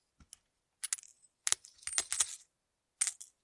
Coins - Money 04
Pop some tissue and a woolly hat in a bowl, pop that in the sound booth next to the mic and let your coins drop. Then edit that baby - cut out the gaps that are too far apart until the impacts of the coins land at the time you want.
coin, Coins, drop, hit, Money, pop